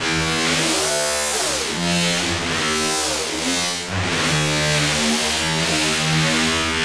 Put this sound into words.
Make It Stop 140
Some fairly cool and somewhat annoying sounds I came up with. Thanks for checking them out!
broken, experimental, glitchy, industrial, noise